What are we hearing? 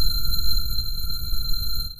photo file converted in audio file
audio, photo